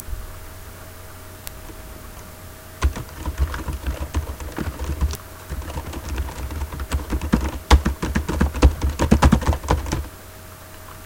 Typing on Ordi laptop keyboard
ordi, Keyboard